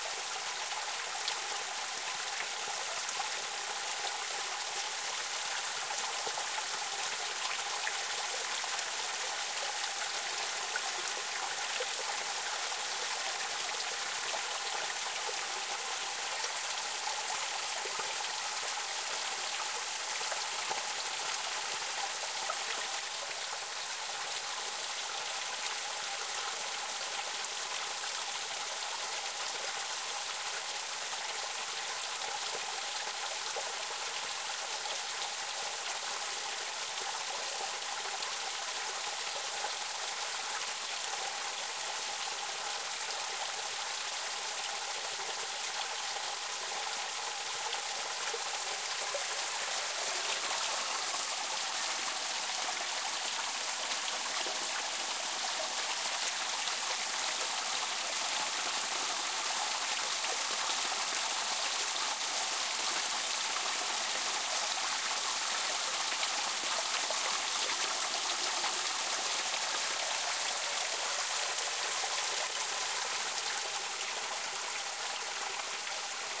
140211-000 puka water
small stream 00 - South Portugal - Winter 14